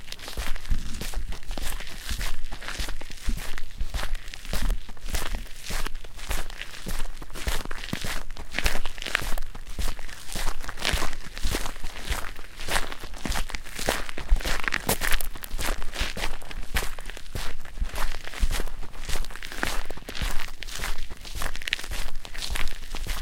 Walking o ground
Footsteps on a gravel road
foot, footsteps, gravel, ground, step, steps, walk, walking